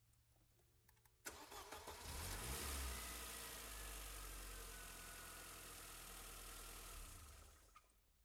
Clip featuring a Mercedes-Benz 190E-16V starting and giving a small rev. Mic'd with a DPA 4062 taped to the radiator support above the driver's side headlight.
benz, car, dynamometer, dyno, engine, mercedes, start, vehicle, vroom